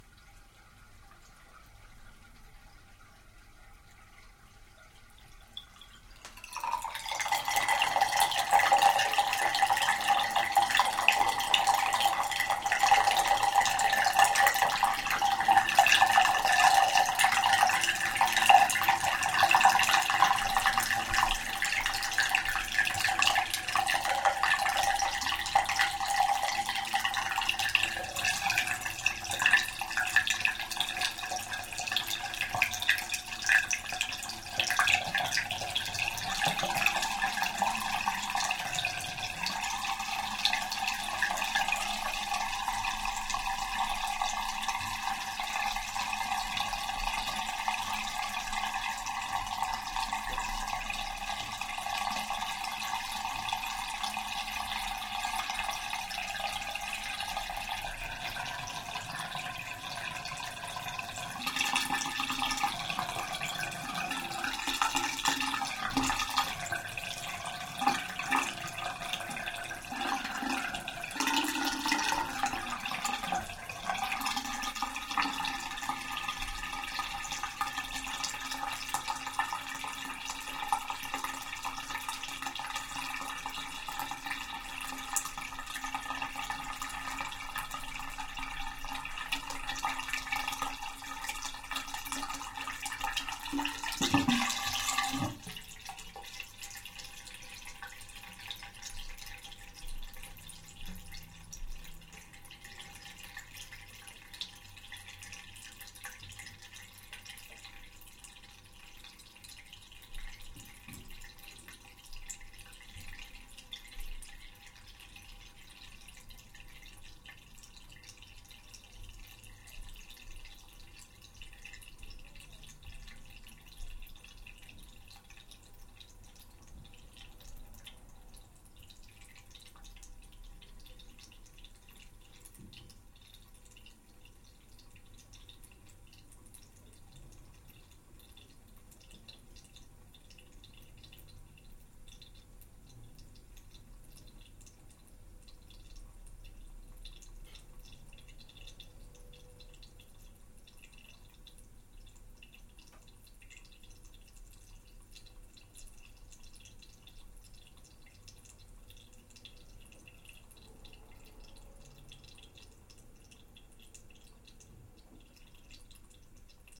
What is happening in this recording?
water gurgling in the bath overflow hole full circle
Water gurgling bath overflow hole. At first time water level is over overflow hole, then gurgling appear and when water level down - gurgling disappear.
gurgling, overflow-hole